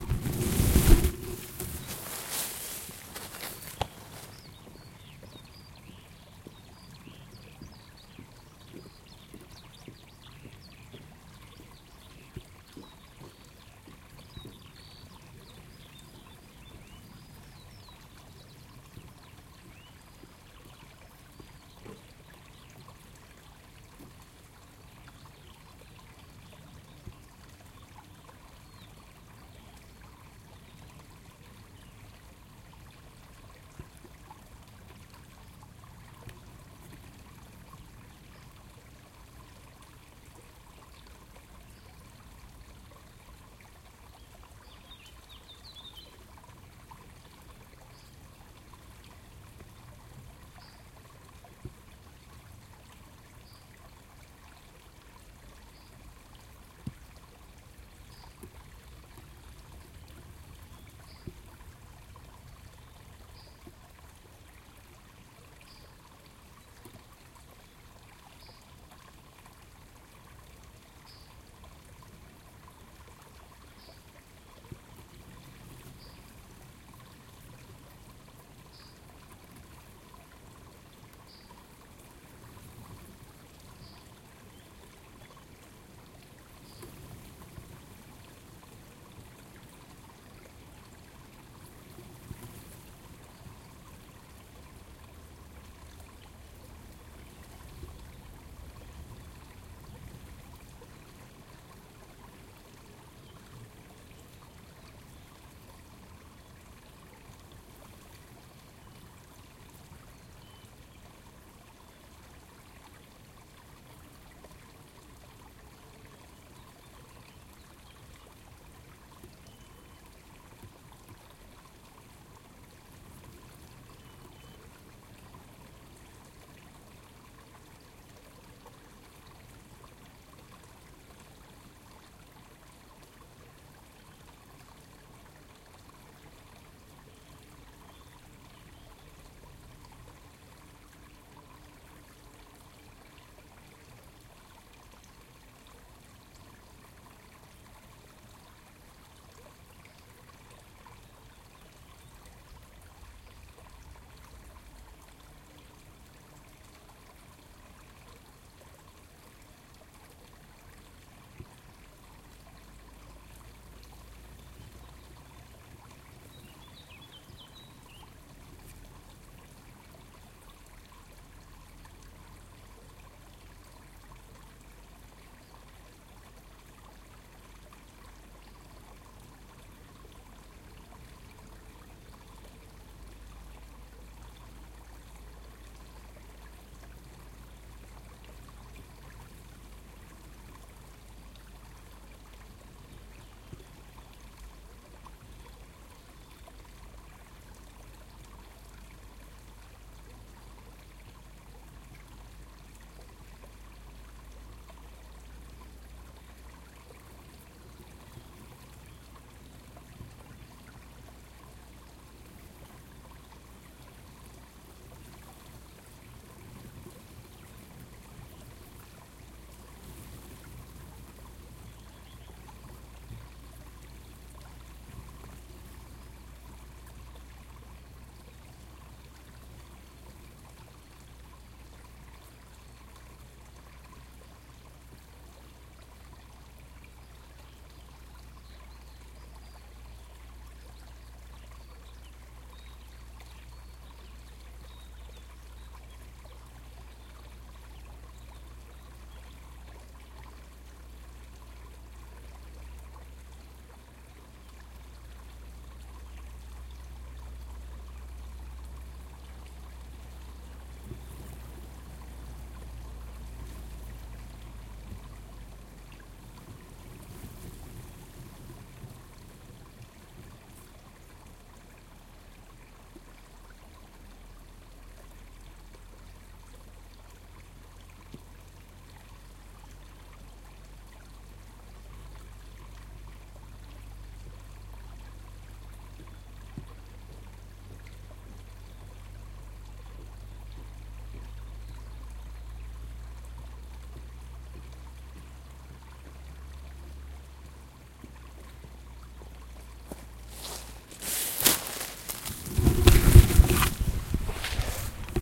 small spring stream in the woods - front
small spring stream in the woods
field-recording, forest, nature, small, spring, stream, woods